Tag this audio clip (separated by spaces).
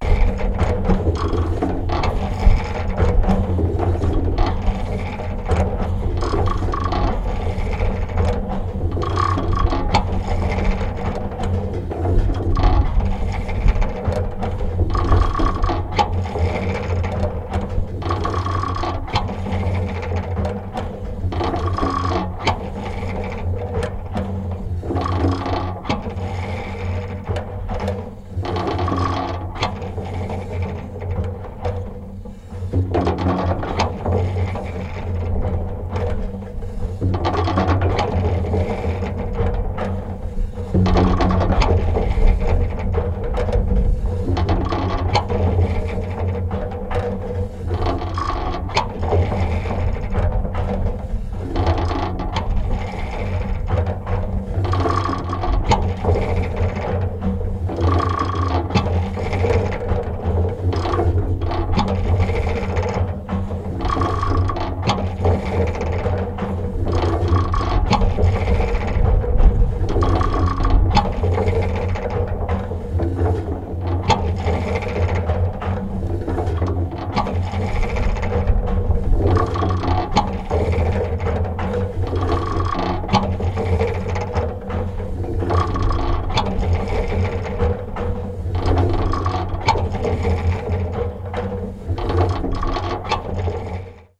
field-recording mechanical new-mexico water water-pump windmill windpump